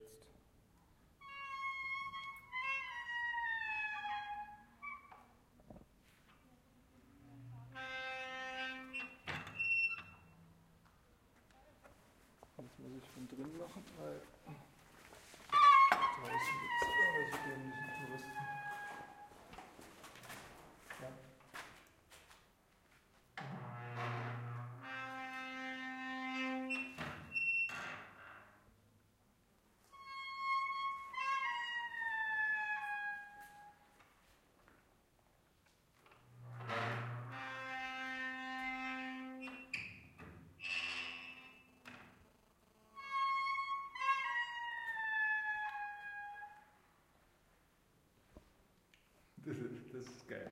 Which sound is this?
Knarzende Tür Tor MS
open and close large wooden door in a church in Romania, Recorded with Zoom H6 MS
church, door, field-recording, wooden